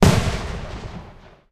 A crack from a firework in open field.
crack, field-recording